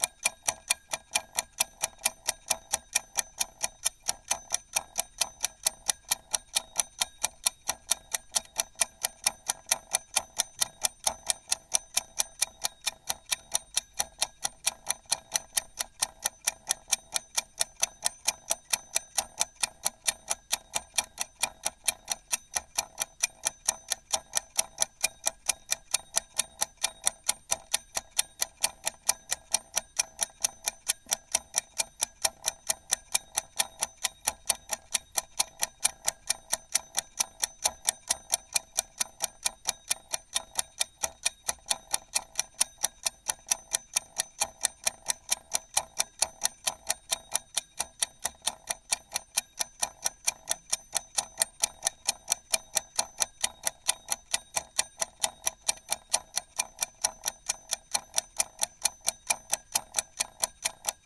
20180403 Kitchen timer
clockwork, clock, kitchen, tension, mechanical, timer, ticks, tic, tac, ticking, tick-tock, time, onesoundperday2018